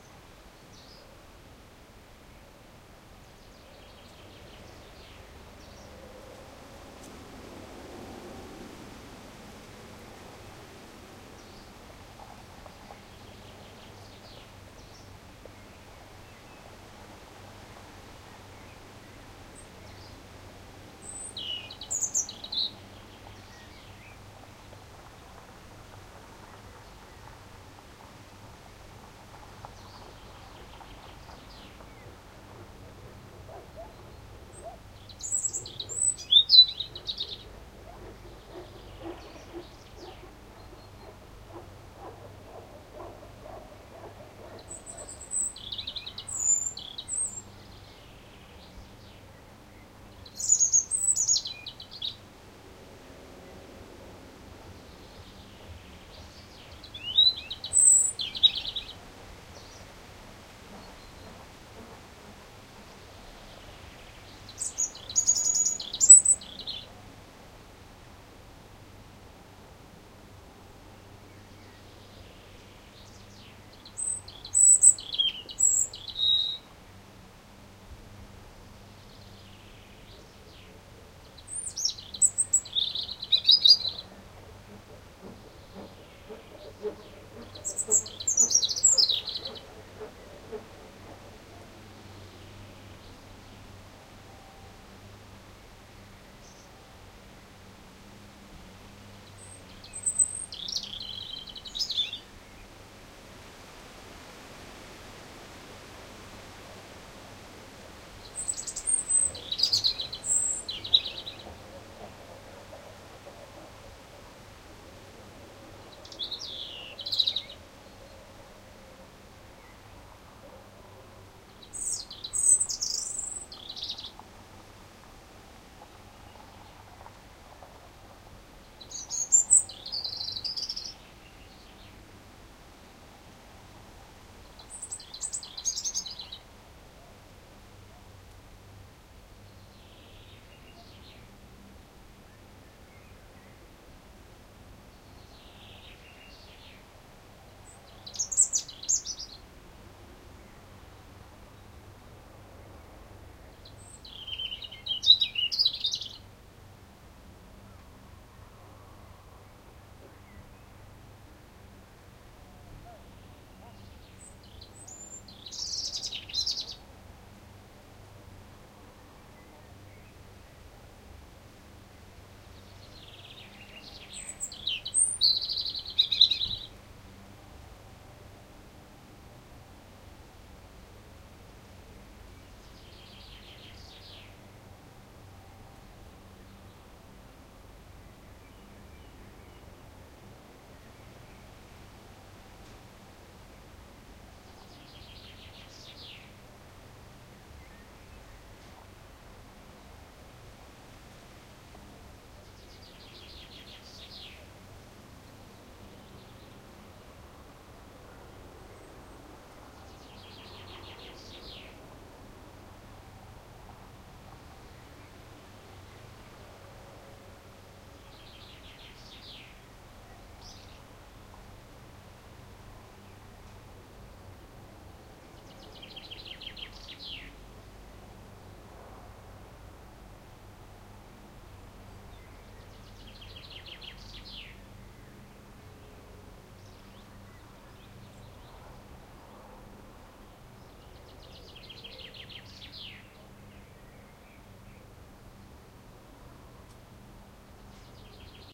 Garden in Bork 2012-06-15
A brief couple of minutes from a garden in the middle of an area with a lot of summer houses. A lot of work is going on in the background, but you have to listen very close to hear it. The birds in the foreground are having a good time though.
Recorded with a Zoom H2
background, garden, working, birds, bird, relaxed, saw, work, background-noise, relax, atmosphere, countryside